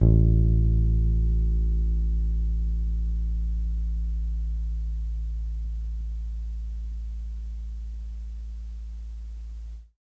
Tape Bass 4
Lo-fi tape samples at your disposal.
collab-2, lo-fi, lofi, tape, mojomills, Jordan-Mills, vintage, bass